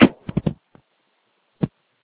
hang-up8
another strange hang up, with two short and one long drop. Not mixed, really recorded during a call.
telephone, phone, call-drop, hang-up